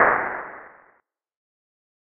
Distant rifle or pistol report. Fully synthed. I tried to capture the sound of distant gunfire in a wide open natural space as best as I can remember it.